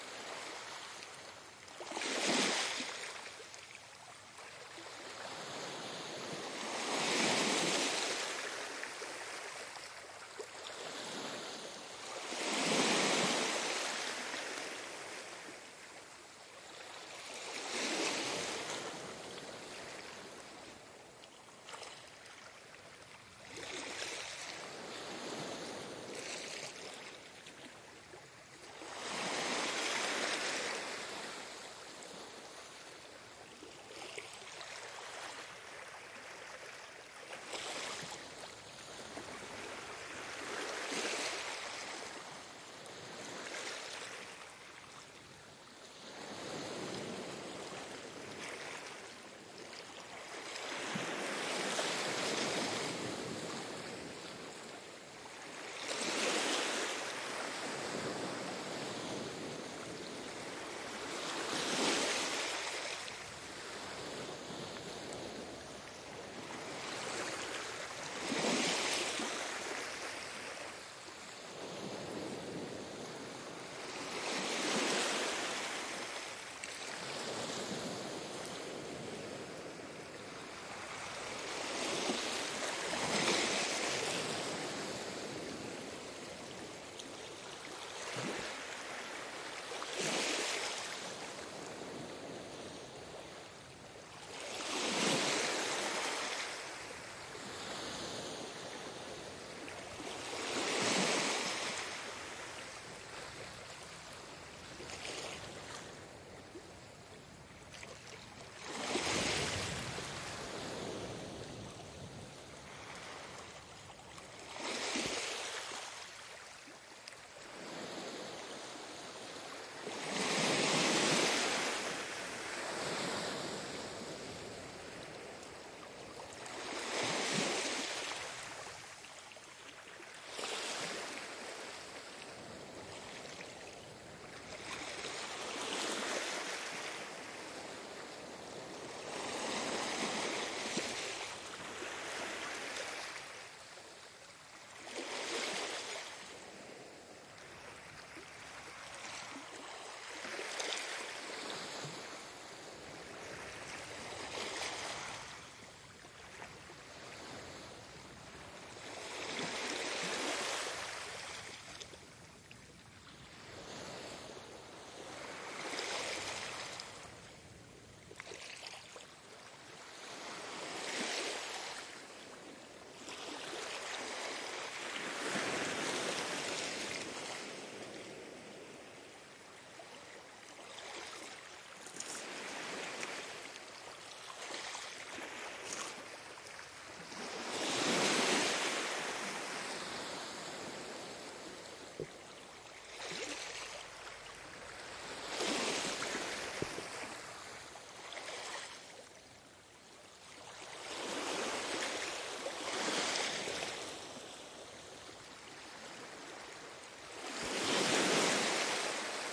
Calm sea on the left, gentle waves on sand beach. Recorded on Cantar X with two Neumann KM 84 (ORTF) and one KMR 81 (Central).